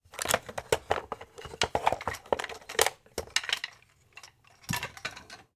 Rummaging Through Wooden Toys v3 - tight micing
An attempt to fill a request for the sound of rummaging through a wooden chest (trunk) filled with wooden toys...tight mic placement for variation.
Gear: Zoom H6, XYH-6 X/Y capsule (120 degree stereo image), Rycote Windjammer, mounted on a tripod, various wooden toys/items.
ADPP, antique, chest, close, foley, latch, latches, lock, locks, mic, objects, tight, trunk, wood, wooden